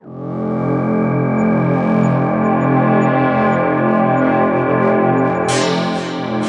SemiQ leads 21.
This sound belongs to a mini pack sounds could be used for rave or nuerofunk genres
atmosphere, dark, deep, delay, drone, effect, efx, electronic, experimental, fx, pad, processed, sci-fi, sfx, sound, sound-design, sound-effect, soundeffect, soundscape